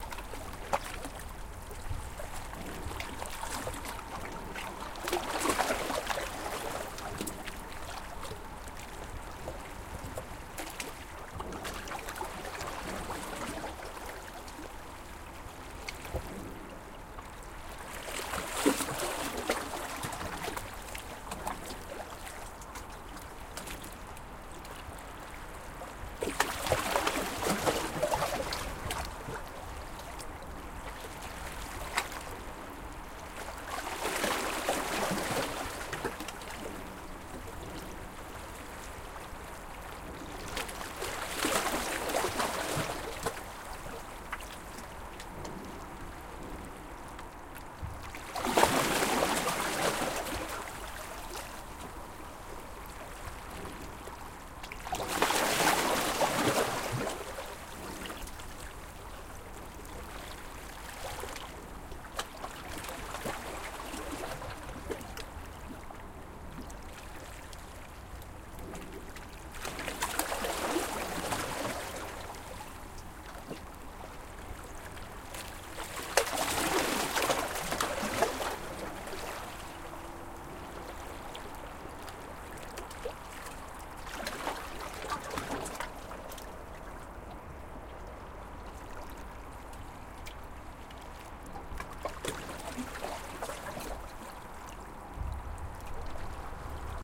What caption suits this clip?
Baltic sea Tallinn 21.01 3
Recorded Tascam DR44WL at winter morning near baltic sea
Winter, Beach